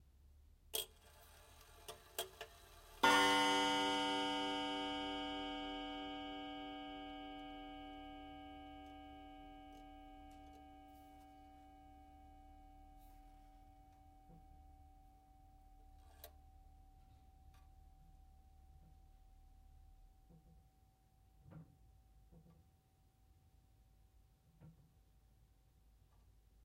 Delft chimes 1
A Delft antique clock chimes once, with mechanism. The chime is low pitched.
chimes, delft